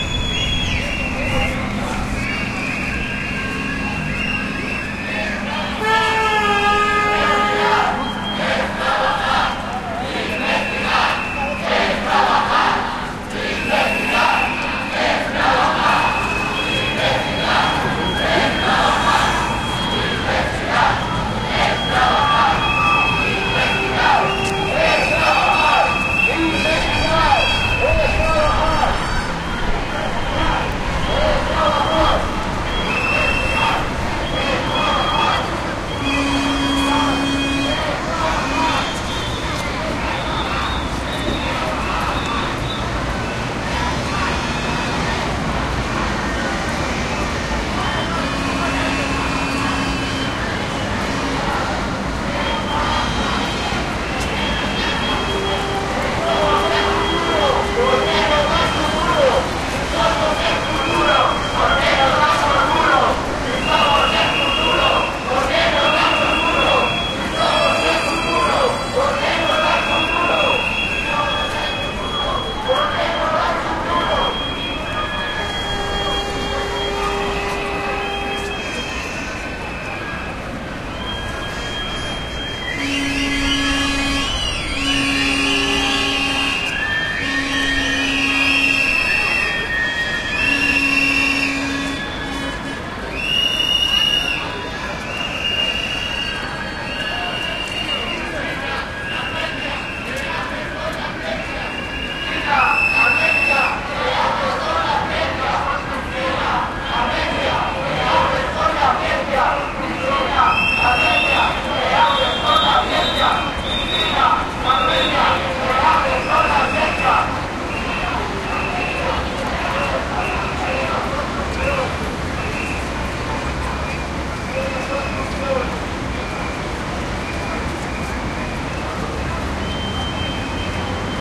people shouting slogans (in Spanish), whistling and making all types of noises during a demonstration at Paseo del Prado (Madrid) against cuts in the research budget by the Spanish Government. Slogans in Spanish: "Investigar es trabajar" (Research is a hard work), "Si somos el futuro por qué nos dan por culo" (If we are the future why are you fucking us?), "Cristina Garmendia, que haces con la ciencia" (Cristina Garmendia, what are you doing to science?). Olympus LS10 internal mics
ambiance, city-noise, demonstration, field-recording, madrid, shouting, slogans, spanish